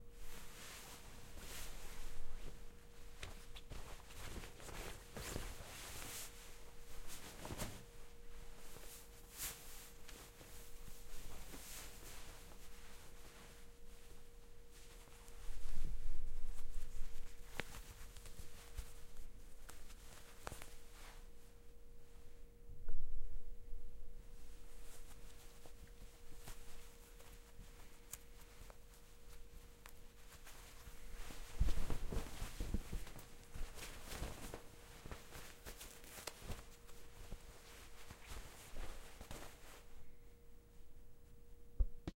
The sound of someone putting on a blazer and moving around in it.